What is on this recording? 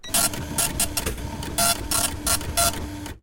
Cashier,Receipt,Servo
Servo from a cafe cashier machine
Recorded with an h4
cashier, servo